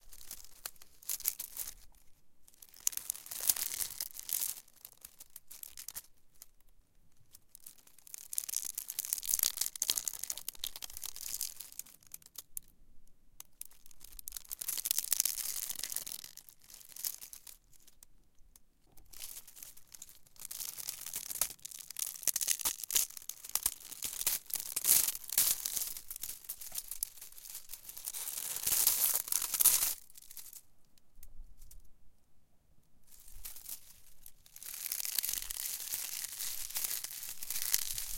Seaweed dry-crumble 090714
Recording of crumbling dry seaweed. Tascam DR-100.